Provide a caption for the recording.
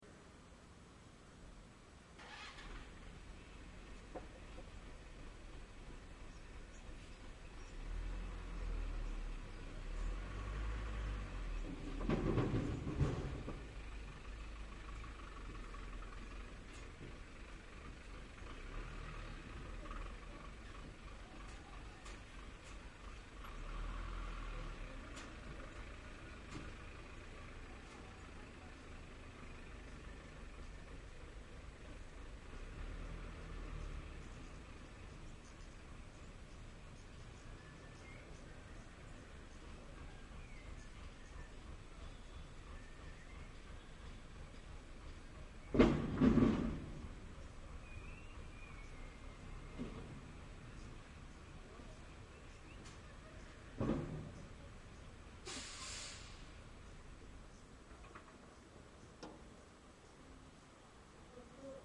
120619 05 Dump Truck Motor 2
Taking apart a stone wall next door. The dump truck starts its engine and moves. Recorded on a Canon s21s
hauling, dump-truck